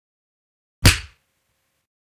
Cartoon Punch 03
punch, cartoon-sound